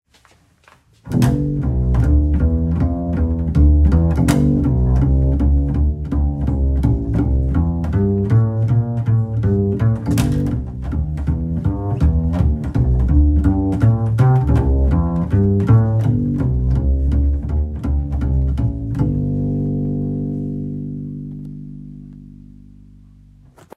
Double bass walking
trance, drumbass, effect, club, techno, electronic, bass, glitch-hop, rave, dub, house, sub, doublebass, loop, electro, dub-step, fx, dance